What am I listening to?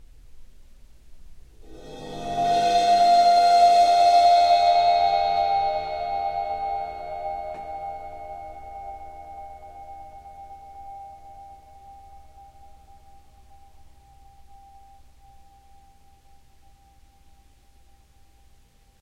Cymbal Swell 002
bowed cymbal swells
rare 18" Zildjian EAK crash ride
clips are cut from track with no fade-in/out. July 21St 2015 high noon in NYC during very hot-feeling 88º with high low-level ozone and abusive humidity of 74%.
ambiance, ambient, atmosphere, bowed-cymbal, overtones, soundscape